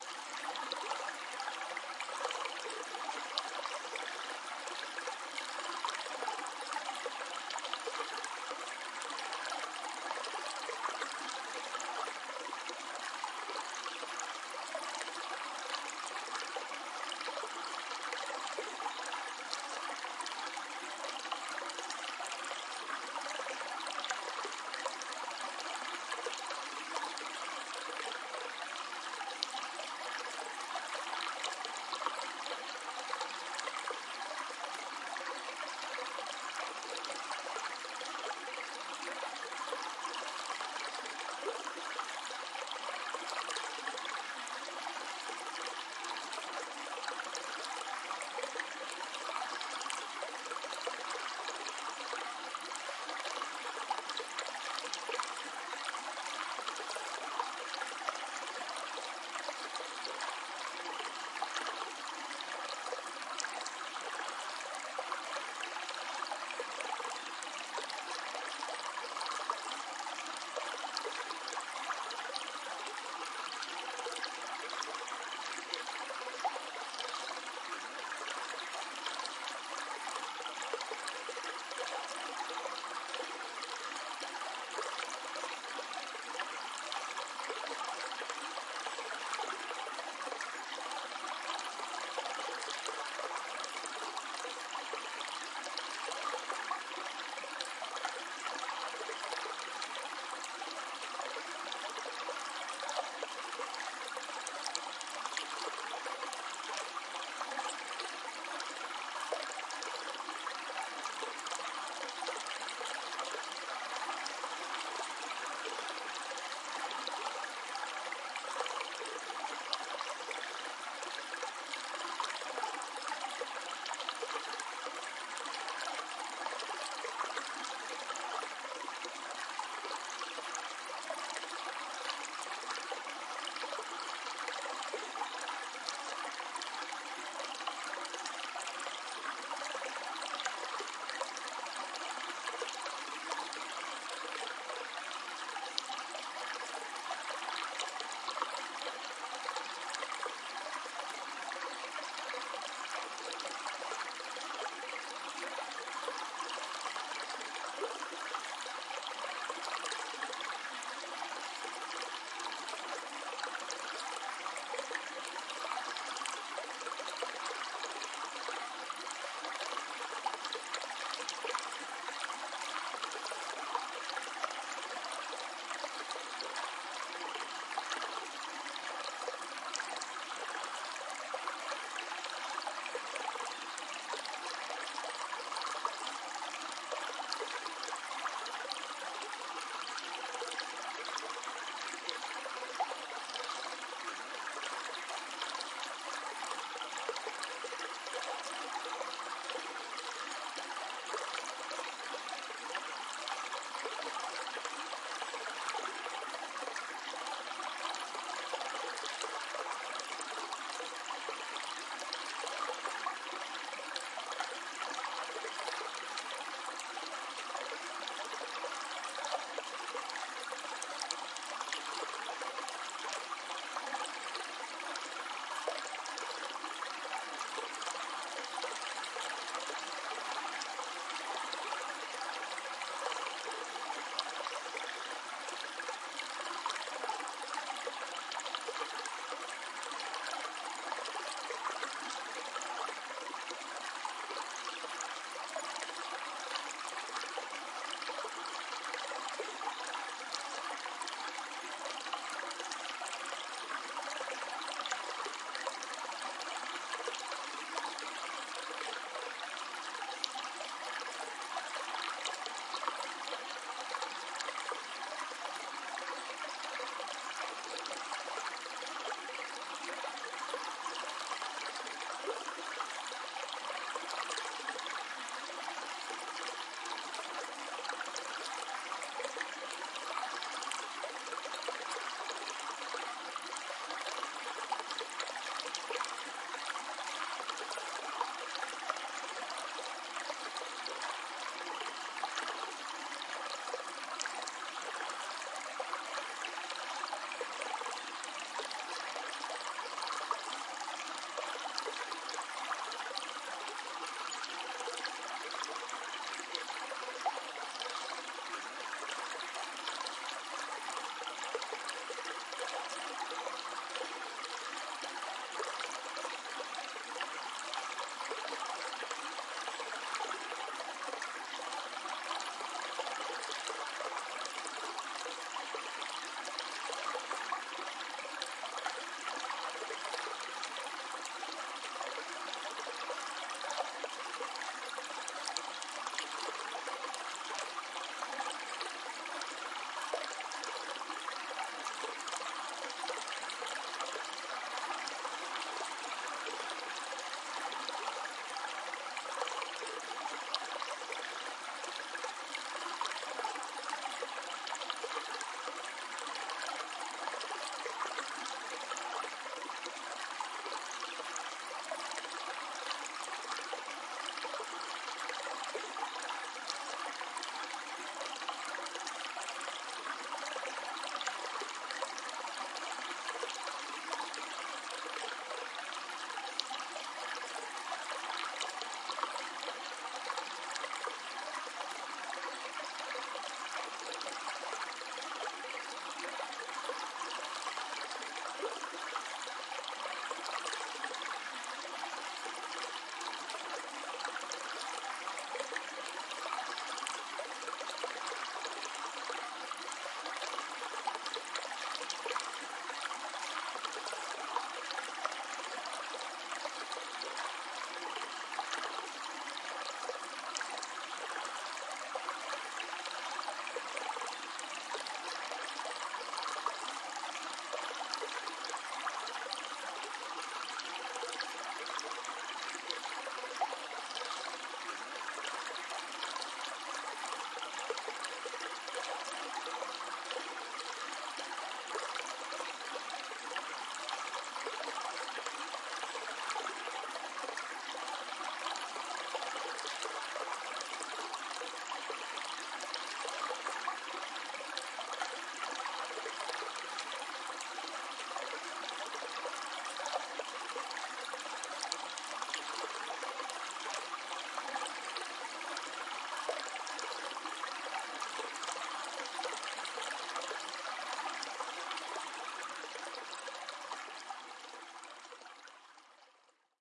Water stream flowing in a river. Nature sounds recorded in the Scottish Borders.
Please put in the description.
Audio
Calm Stream In Forest by Sonny Fascia
babbling, stream, river, trickle, flow, relaxing, forest, creek, gurgle, ambient